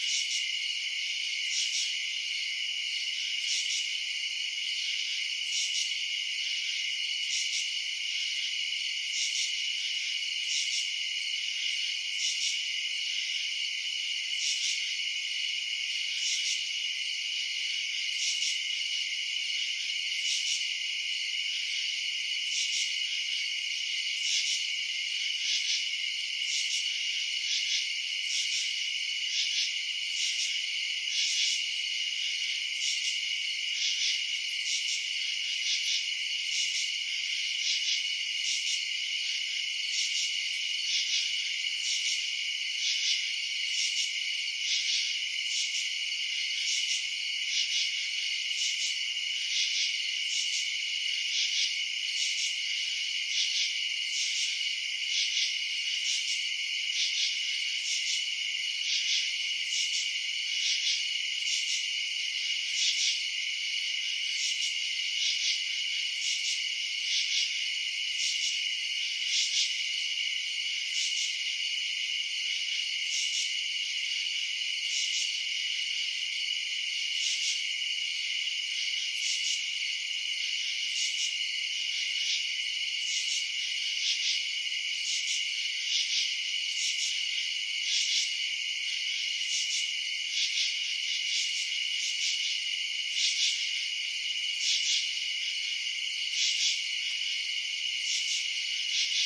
crickets residential night suburbs5
suburbs, crickets, residential